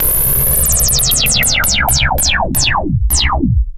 A slowing, retro sci-fi laser zap sound.